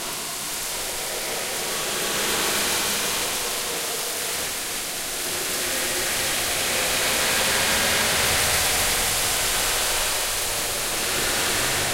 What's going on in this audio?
spooky leaves and wind
Creepy sound of leaves rustling in the wind. processed to be unnatural sounding
blowing, branches, breeze, forest, gale, gust, howl, leaf, leaves, nature, rustle, rustling, spooky, storm, tree, trees, wind, windy